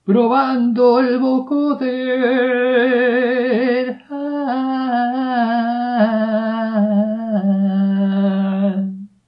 prob vocoder
Creado probando un plugin de Vocoder en LMMS con el sinte Firebird y una pista de voz. Losd iferentes sonidos son resultado de cambiar la configuración del sonido del Firebird.